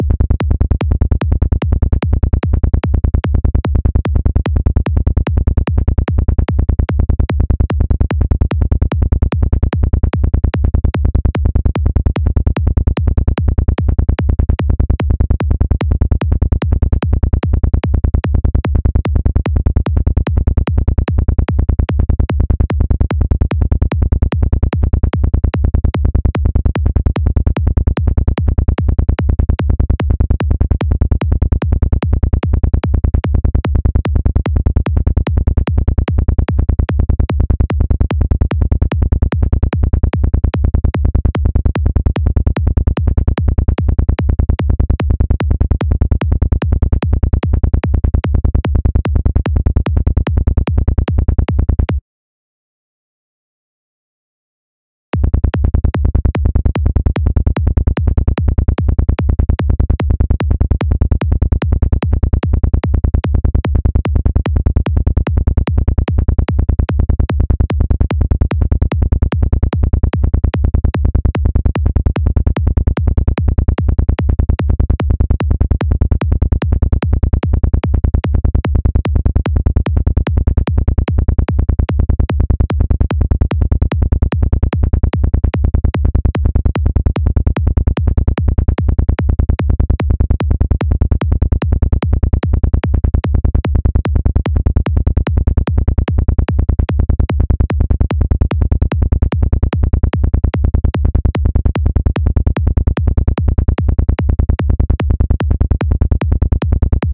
Kick + Bass = 148 bpm ( C )
bass, kick, psy, psytrance